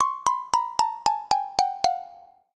Xylophone for cartoon (13)
Edited in Wavelab.
Editado en Wavelab.